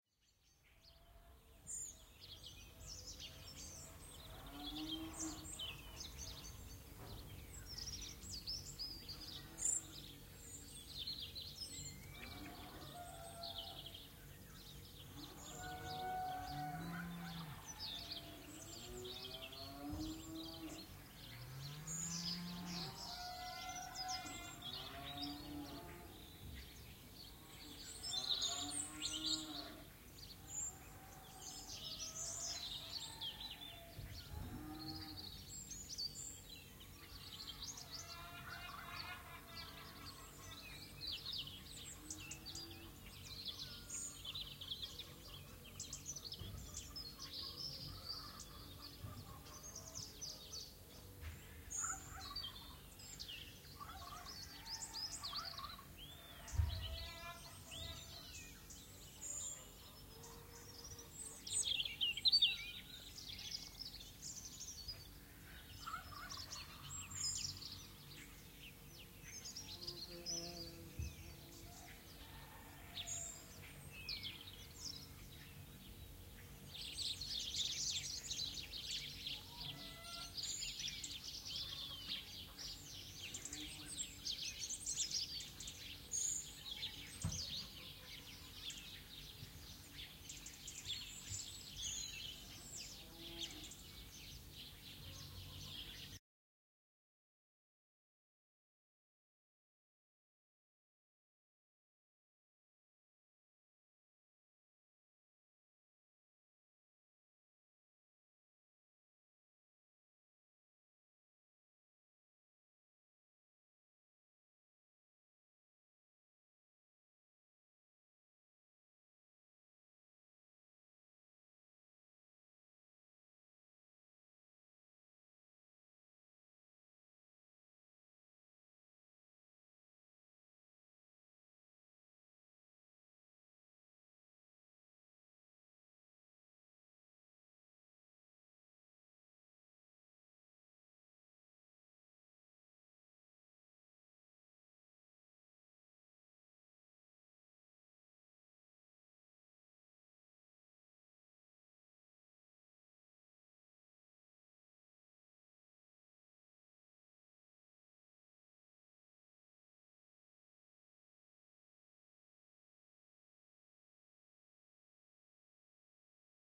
amb - outdoor rooster cows
forest, field-recording, galiza, farm, ambiance, nature, rooster, birds